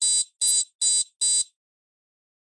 BeepBeepBeep (Technology)
Using a phone and recording an beeping error from a "Citizen" Thermal-Temperature Scanning Kiosk. This Unique sound effect depicting 4 repeating beeps can be used to depict part of a background ambience for a computer room, Sci-Fi Laboratory, or a control room.
Recorded using a Samsung Galaxy Edge 7 and edited with Cakewalk by Bandlab. Enjoy!
Machinery, Computer